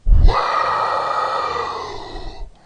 dragon roar breathy 3
Dragon sound created for a production of Shrek. Recorded and distorted the voice of the actress playing the dragon using Audacity.
creature, vocalization